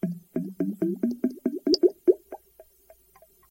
This is the noise my bathtub faucet makes once you have turned off the water. Recorded with a Cold Gold contact mic into a Zoom H4.
liquid, tub, noise, dip, flow, water, contact, glub